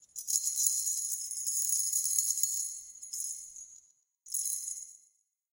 SFX for the game "In search of the fallen star". Plays as the player gets closer to one of the keys
rattle, keychain, keys, key, keyring